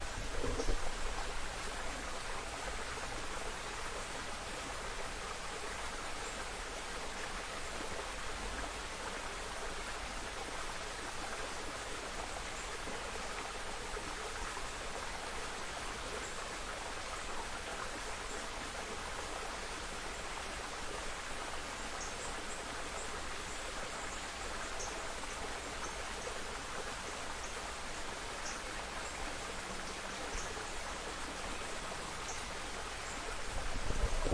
Spring Fed Creek Further Down
This is an ambient recording of the main spring that feeds Lake Atalanta in Rogers, Arkansas. The recording was made using a Zoom H5N recorder with a stereo mic capsule held near the surface of the stream about 75 yards from the source. Nice park ambience...
Spring, Water, creek, field-recording, forest, nature